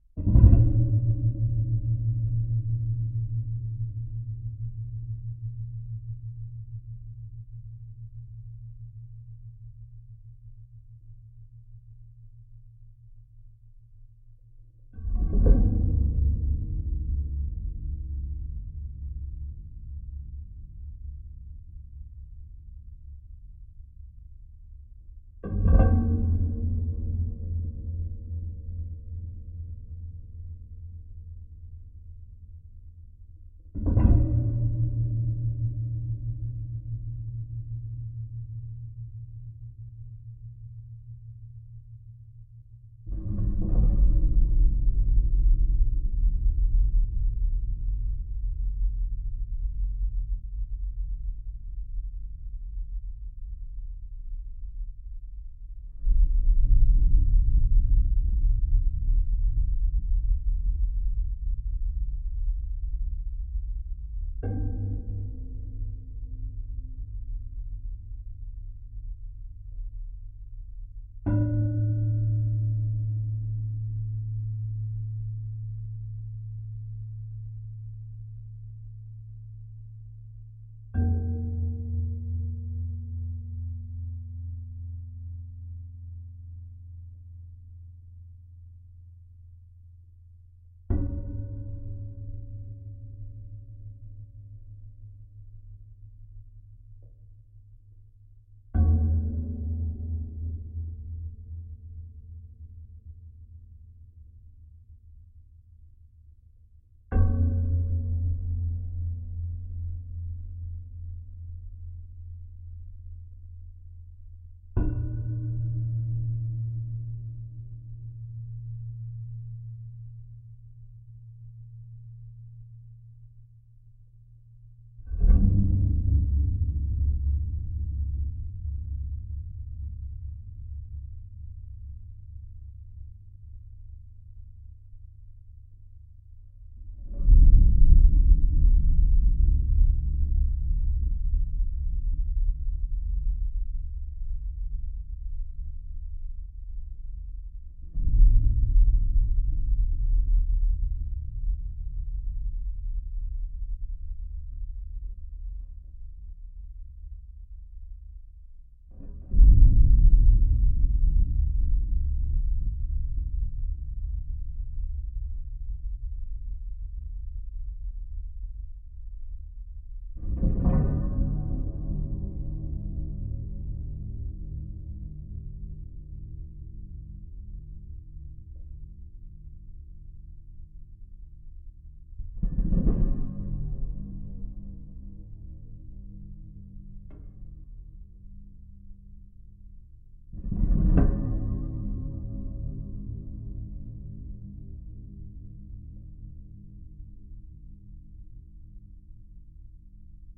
Contact Mic on Metalic Foldout Clothes Hanger 1
Contact mic recording of a large folding clothes hanger/dryer. Tapped and plucked on the stems for hanging clothes to create some weird drone and percussion sounds.
Recorded with a LOM Geofon contact microphone into a Sony PCM-A10 handheld recorder.
bass, clothes, contact-mic, contact-microphone, drone, dryer, geofon, hanger, home, household, laundry, lom, low-frequency, PCM-A10, perc, percussion, resonance, resonant, sony, sound-fx, washing, weird